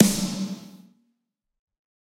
Snare Of God Wet 034
drum, drumset, kit, pack, realistic, set, snare